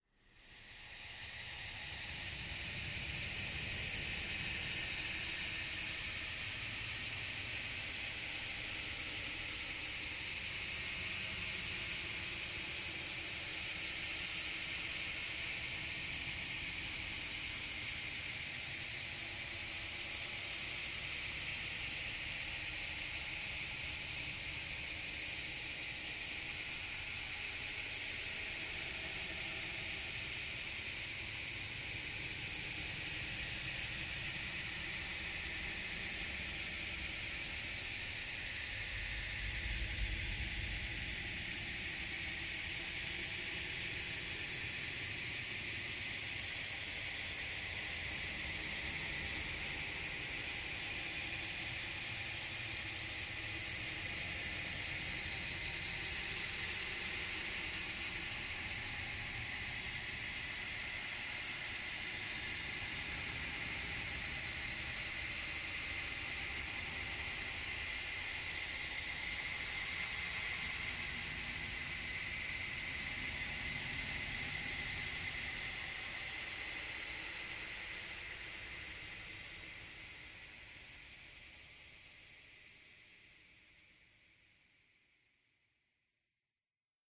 Hot steam leaking from a pipe. It's actually made from a ticking noise "Fast Ticking Slowing Down" with paulstretch applied in Audacity
ambiance, ambience, ambient, atmo, atmos, atmosphere, audacity, background, background-sound, boiling, field-recording, fog, hiss, hot, ice, leaking, nature, noise, paulstretch, pipe, smoke, soundscape, steam, warm, water, white-noise